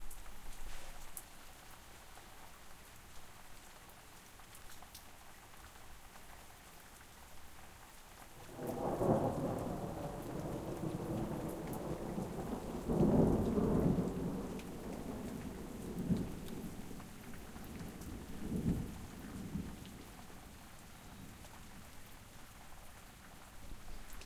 Rain and Thunder 6
field-recording, lightning, nature, rain, storm, thunder, thunder-storm, thunderstorm, weather, wind